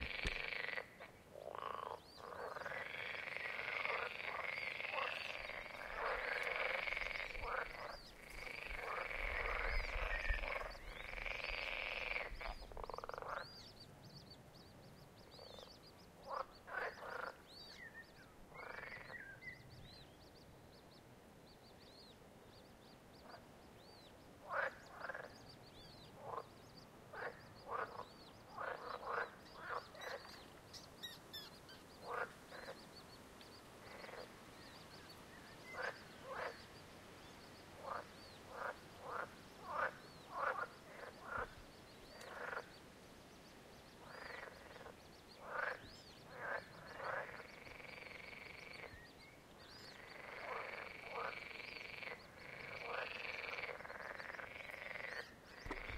Nature sounds frog 2
Field-recording of a forest pond filled with frogs croaking loud.
Recorded with Zoom H1